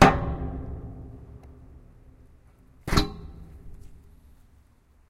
Big clang sound of a metal door closing